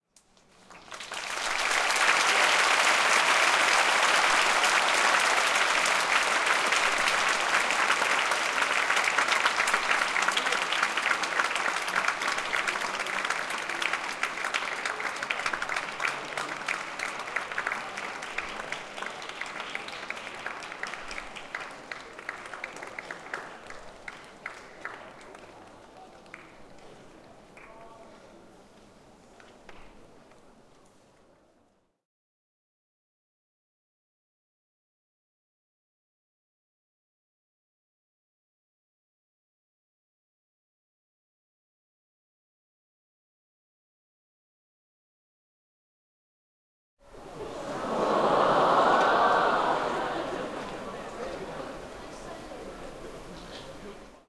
A stereo field recording. This is the applause before the curtain call of a local drama production, recorded at the circle of the Hong Kong Cultural Centre Grand Theatre. Recorded on an iPod Touch using RetroRecorder with Alesis ProTrack.
crowd, indoor, human, theatre, applause